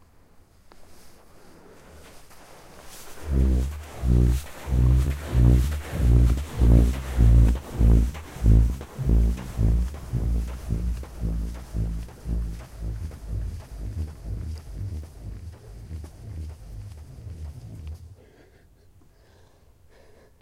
Singing sand dune
burping, drone, musical-sand-dune